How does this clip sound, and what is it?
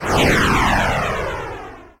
Sounds like a jet or rocket bypassing superfast !
If you enjoyed the sound, please STAR, COMMENT, SPREAD THE WORD!🗣 It really helps!